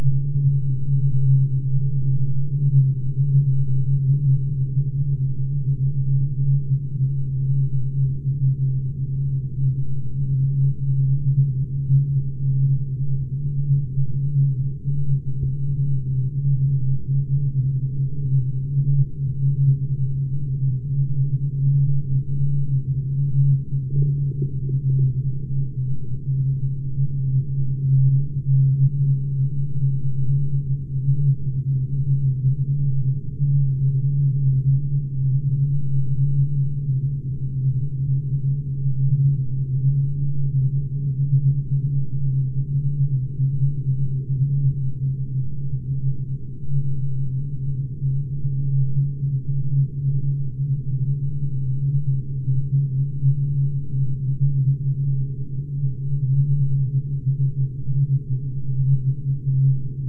horror zone02
thrill, horror-fx, terrifying, horror, ghost, terror, horror-effects